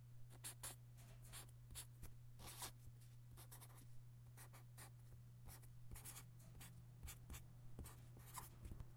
WRITING MARKER 1-2
Marker on Paper
scribble, writing, Marker